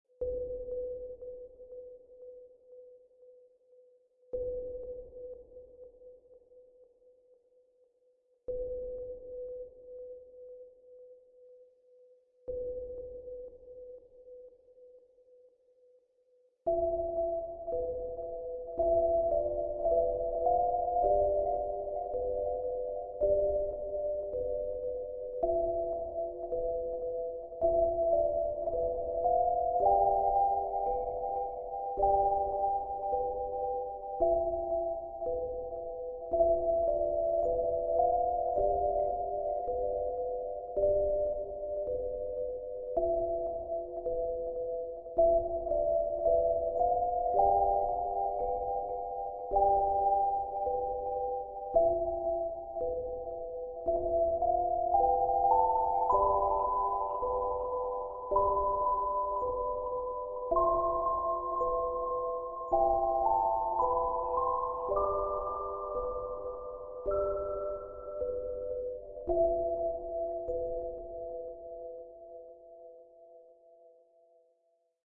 Sad heaven piano #3
3, heaven, melancholic, melody, piano, sad, Sadness, Sadnessinus, tragic